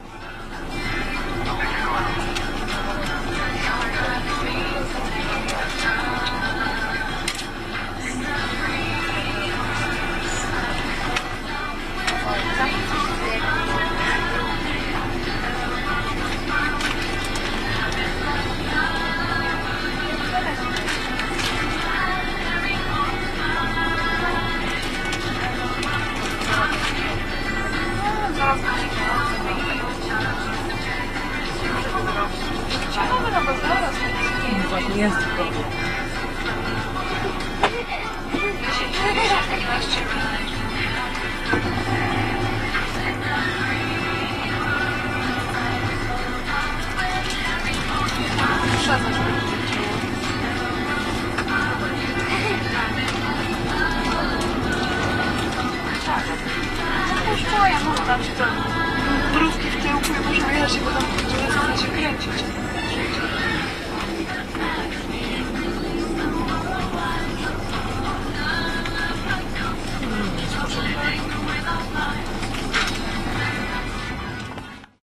03.07.2010: about 13.20 in the PKS (Polish Bus Transport)bus that stopped in the traffic jam because of some car accident. It was between Leszno and Poznan (Lipno village).